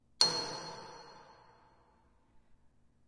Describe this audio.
Here's one from a series of 'clang' sounds, great for impact moments in trailers & commercials, or to layer up with other sounds. They are somewhat high-pitch, so they might mix well with low frequency drums and impact sounds.
Recorded with Tascam DR-40 built-in-mics, by hitting a railing with a pipe in a stairwell and adding a little bit more reverb in DAW.
steel, reverb, hit, clang, impact